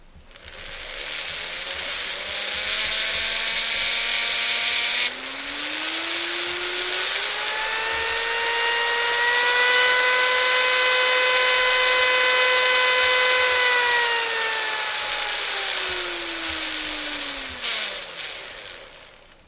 Unfiltered recording of a rotary tool being turned on and revved up and down through its several speeds